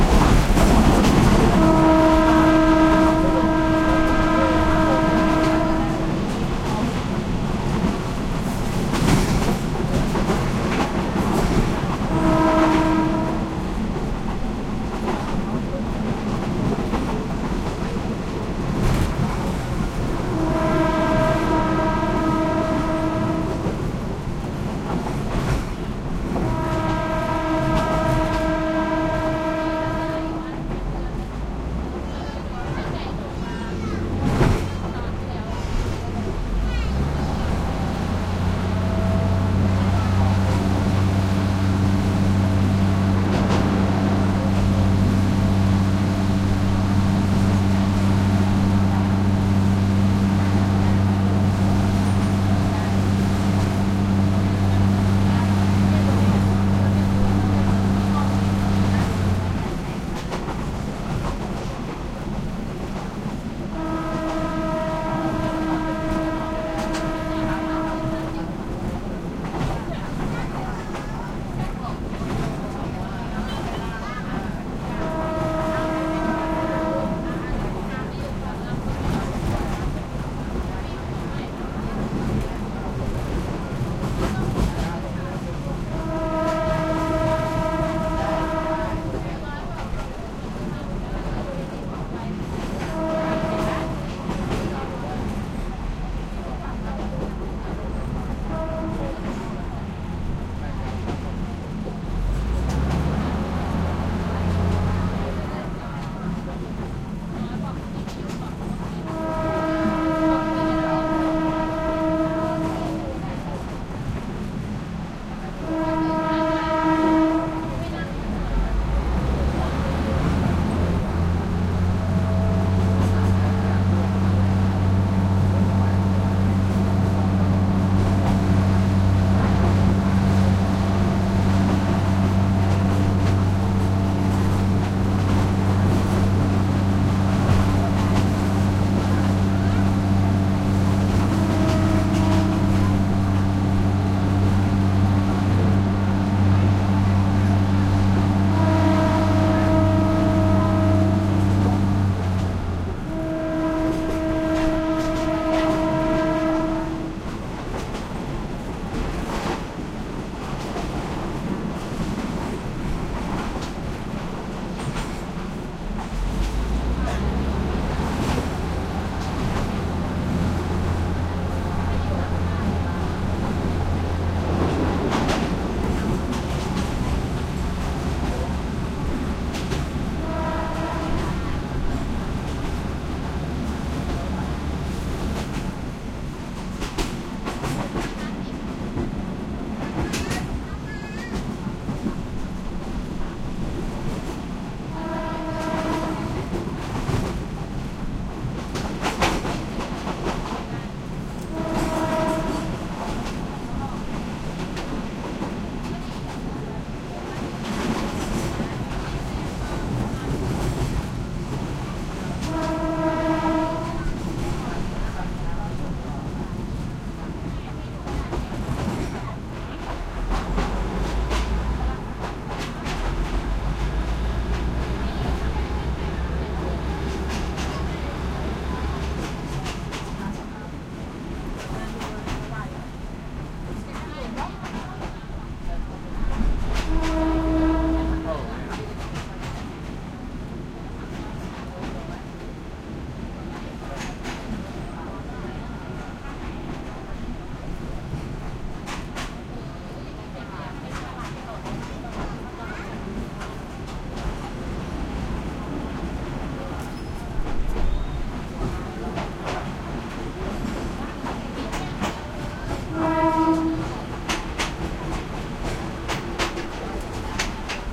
Thailand passenger commuter train open air on board thai chatter walla start stop travelling various, facing door for balanced track movement and loud engine5
Thailand passenger commuter train open air on board thai chatter walla start stop travelling various, facing door for balanced track movement and loud engine
Thailand, chatter, passenger, onboard, walla, train, commuter